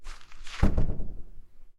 Door Close Heavy Wooden Slow Quiet Seal Theatre
A heavy wooden door for a theatre control room being quietly closed.
Close, Door, Heavy, Quiet, Seal, Shut, Slow, Theatre, Wood, Wooden